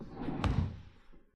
Wooden chair 3
Moving a wooden chair on a wooden floor.
{"fr":"Chaise en bois 3","desc":"Déplacement d'une chaise en bois sur du parquet.","tags":"chaise bois meuble bouger déplacer"}
furniture, moving, table, wooden